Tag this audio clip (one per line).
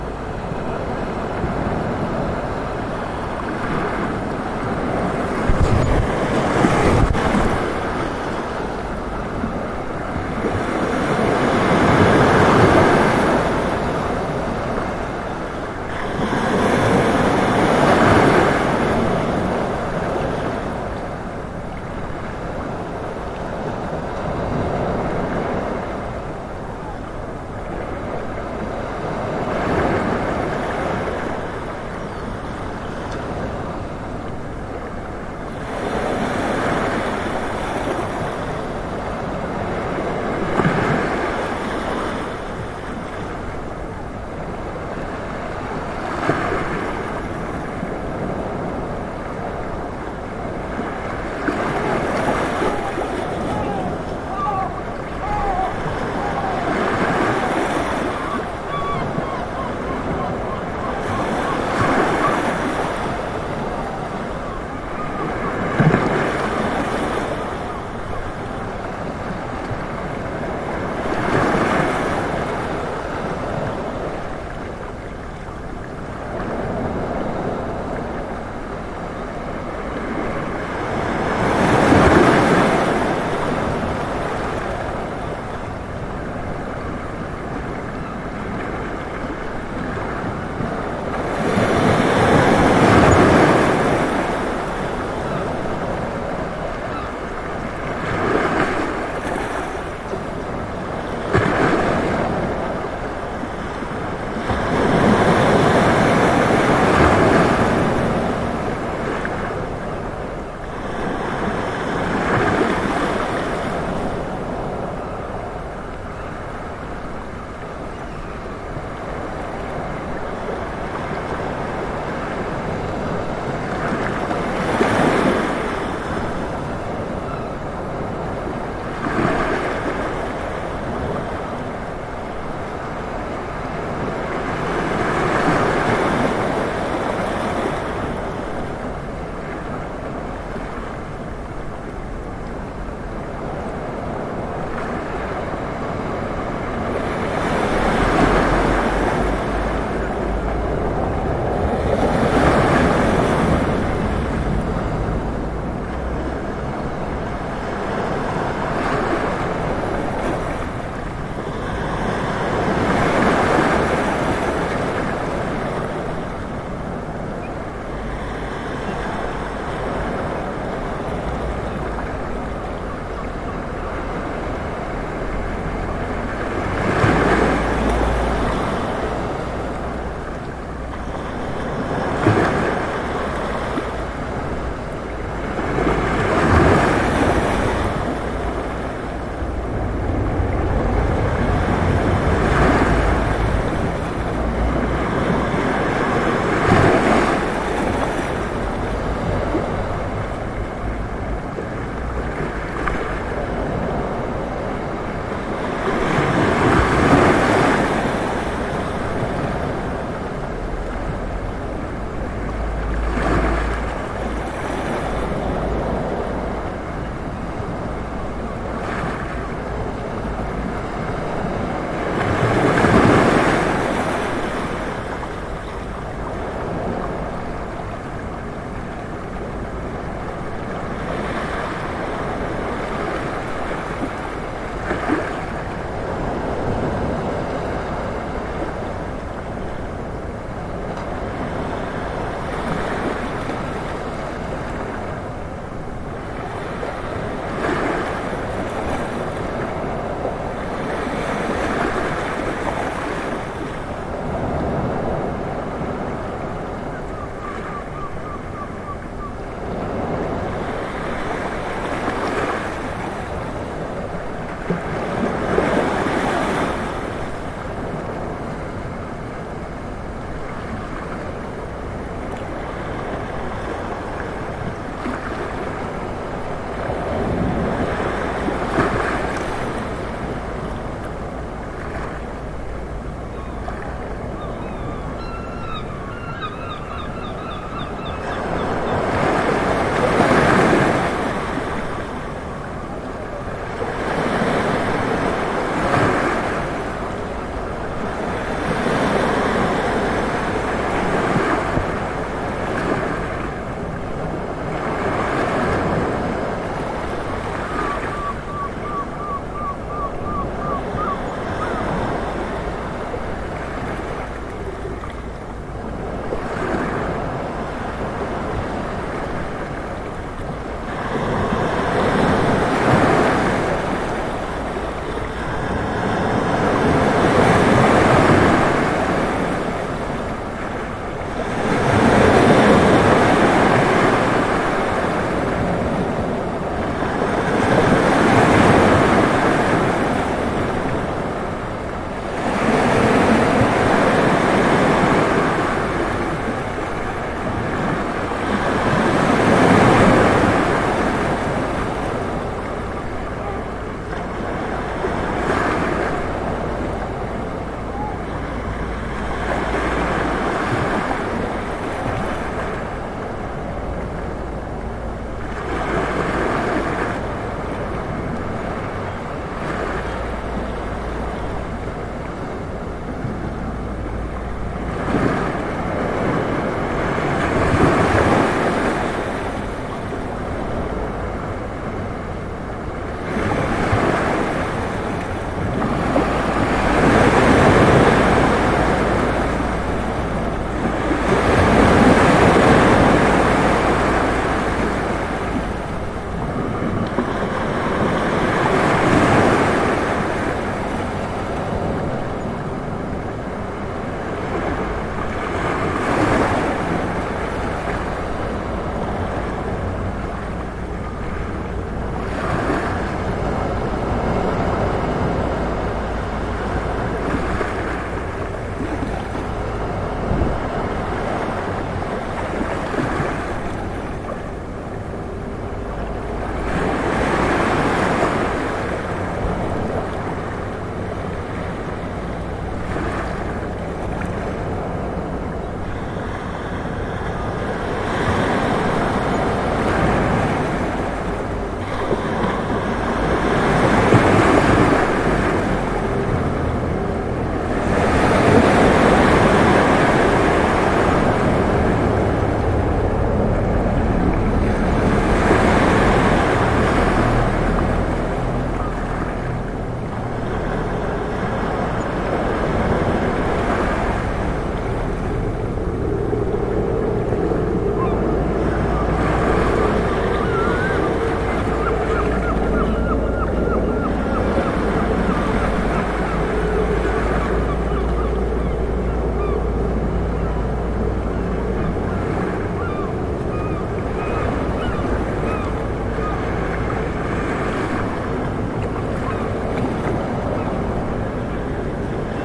beach
ocean